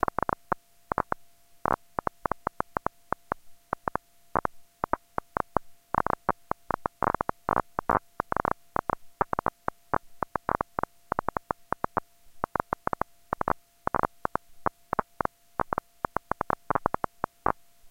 This is just a cable not plugged into anything going into a Nord Modular patch. So basically filtered and manipulated noise!
crackle, bits, nord, noise